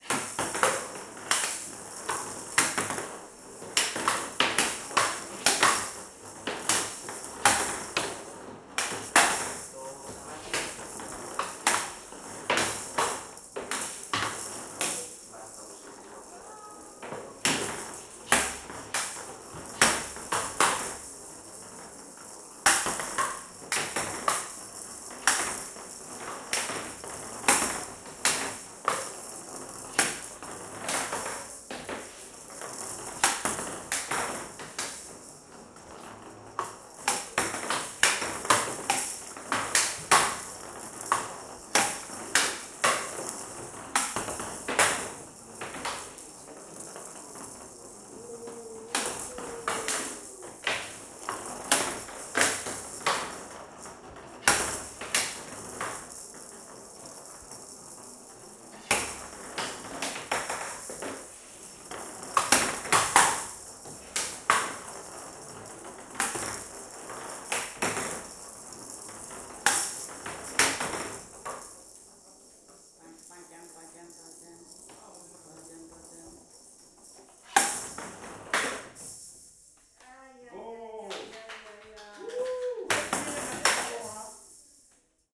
Two blind people are playing a showdown game - special table-tenis for the blind. The ball contains some bells so blind players can hear it. Stereo recording.
game, ball, bell, sport, showdown, table, tennis, blind, tenis